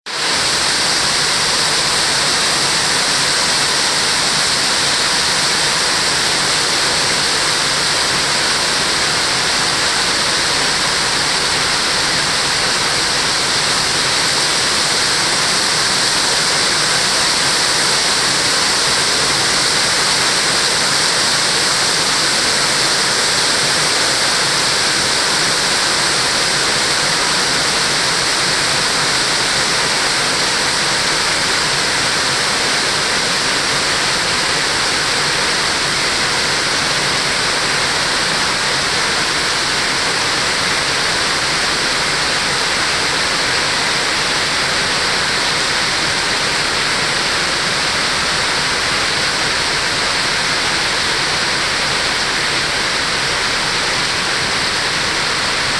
Rainstorm hitting pavement 2
Downpour of rain during the monsoon season in Phoenix, Arizona. Rain hitting blacktop. Nearly a minute in length and you can hear the rain begin to slacken a little ways into the recording. Recorded with the only thing I had on hand, my phone; an LG V30.
monsoon, rain, weather, storm, rainstorm, downpour, ambience, raining, field-recording